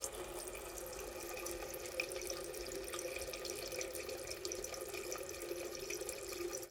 Robinet coulant doucement
A little bit of water from a tap recorded on DAT (Tascam DAP-1) with a Sennheiser ME66 by G de Courtivron.
tap, water